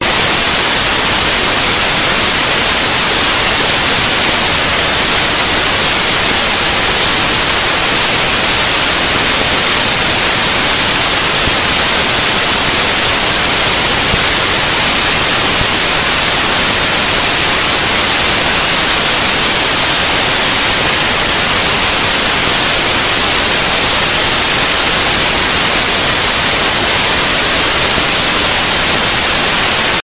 This is just ordinary white noise...The algorithm for this noise was created two years ago by myself in C++, as an imitation of noise generators in SuperCollider 2.
digital, white